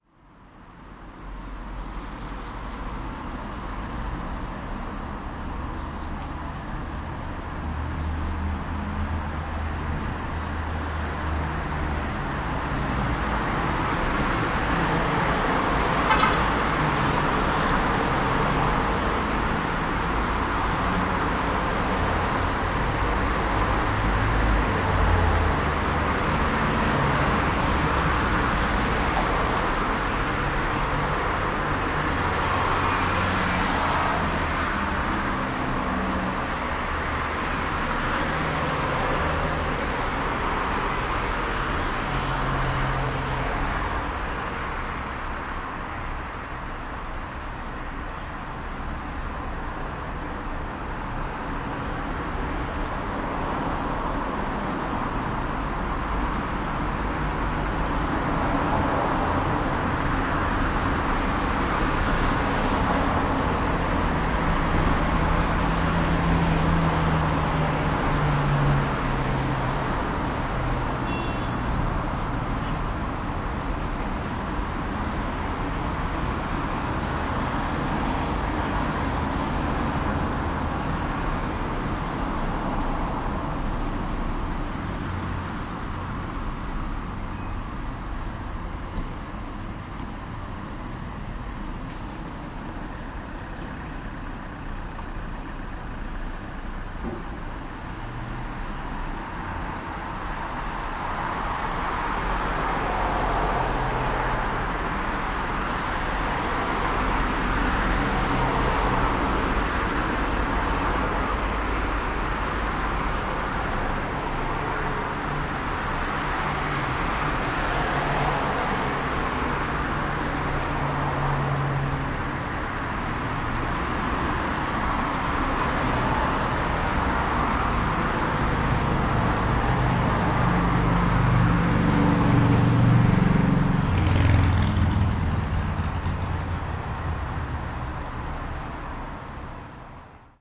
Traffic noise in the street of Tuzla, Bosnia
Recording of the traffic noise taken from the balcony in Marsala Tita street in Tuzla, Bosnia.
Recorded with RODE M3 and Audio Technica AT4040 microphones.
Recording device: Scarlett 2i4 audio interface
Sound recorded in Logic Pro X.
No post processing, except audio level normalizing in Sound Forge Pro.
bosnia, cars, noise, street, town, tuzla